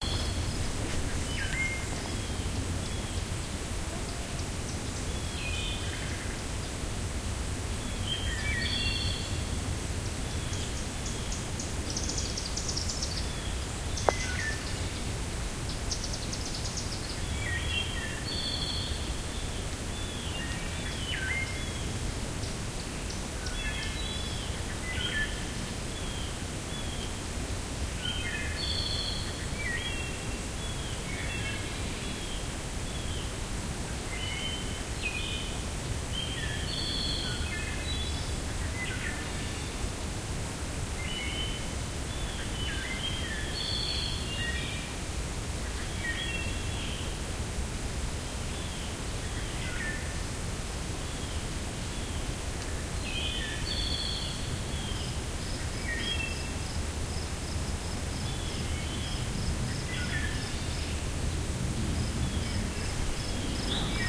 As I rounded the bend in the gravel road, the woods opened out and I found myself on an overlook above a beautiful tree-studded valley with a stream winding through the grass. The sky was getting darker, but a few birds were still singing, most notably a pair of thrushes. Their songs echoed through the valley and a light breeze whispered through the forest behind me.
Recording date: July 15, 2013, early evening.